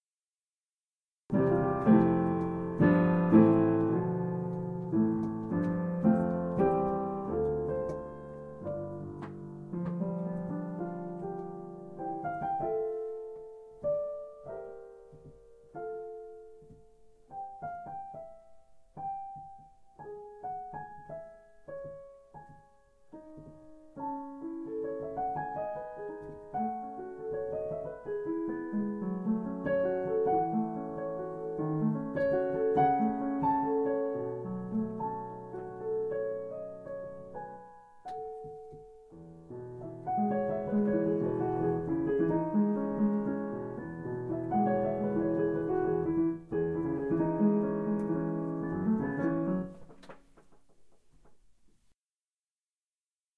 Debussy Arabesque no 1 clip 3
A short clip of a student practicing a section of Debussy's Arabesque no 1 on a Roland Digital Piano. You also hear the sound of a creaking piano bench.
classical, music, piano, practicing